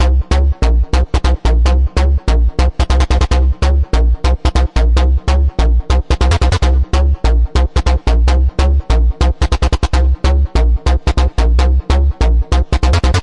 Bass loops for LuSH-101